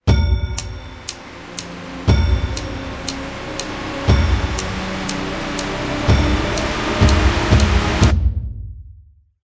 This is a "recreation" of the sounds heard at the beginning of the "Alice in Wonderland" Superbowl TV spot. I used Jobro's Cinematica 7 in reverse and Timbre's Bass Drum and Discordant Bells. The ticking sound I made by tapping a pen. I recorded the full "ticking track" (up to :17 in the spot) but didn't have the string hits to make it sound good.
bass, drums